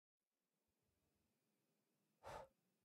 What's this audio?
Blowing Out Candle shorter
Blowing out a candle. Recorded with an H4N Recorder in my bedroom.
blowing, breath, Candle, human